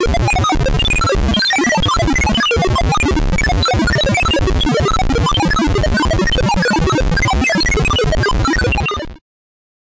Computer Does Calculations 2 (Medium)
High and low pitched beeps that play for a rather short period of time. It resembles how sci-fi occasionally liked to represent the operations of computers.
computer, machine, operating